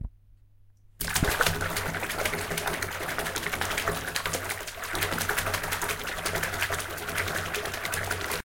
duck in water
animal, nature, water